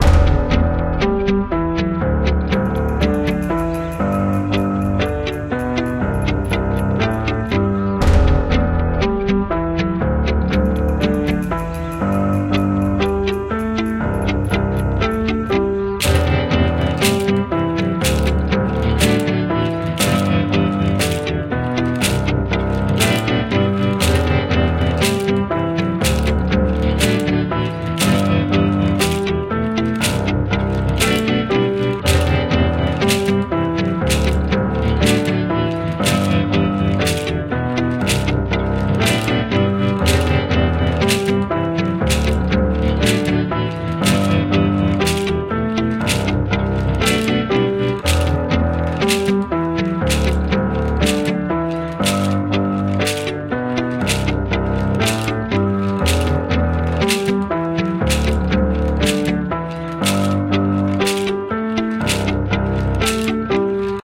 Path of Peril - Investigations OST
music for a game
roblox, investigations